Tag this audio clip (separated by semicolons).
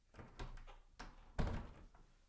door; door-knob; handle; slam